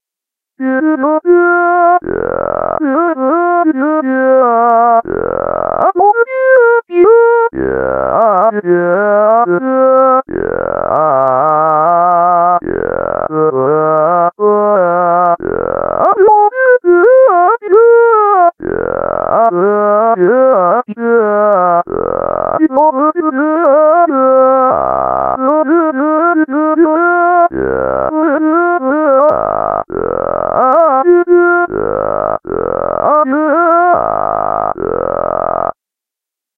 Quarreling old couple
.....but he would not take the garbage out. Done years ago with the first version of Reason´s Subtractor - Singing Synth. Imitation of a quarreling couple.
singing, synth, reason